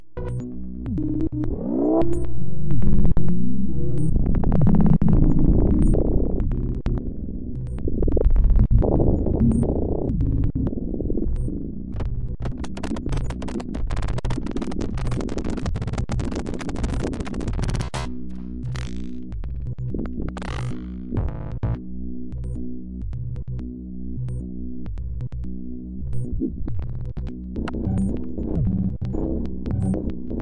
Abstract Glitch Effects 008
Abstract Glitch Effects
Sci-fi, Sound, Effects, Random, Abstract, Glitch, Electric, Design, Weird, Sound-Design